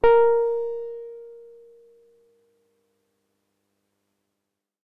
My Wurlitzer 200a Sampled thru a Lundal Transformer and a real Tube Preamp. The Piano is in good condition and not bad tuned (You still can retune 3 or 4 Samples a little bit).I Sampled the Piano so that use it live on my Korg Microsampler (so I also made a "msmpl_bank")
200a, wurlitzer, e-piano